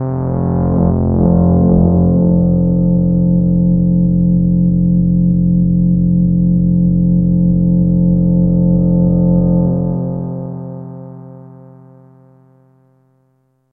Super FM Pad F1
An evolving pad type sound created on a Nord Modular synth using FM synthesis and strange envelope shapes. Each file ends in the note name so that it is easy to load into your favorite sampler.
digital, drone, evolving, multi-sample, multisample, nord, note, sound-design